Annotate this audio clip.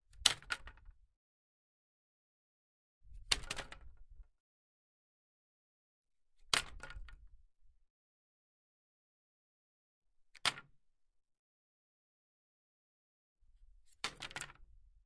the fall of wood
CZ, Czech, Pansk, Panska, wood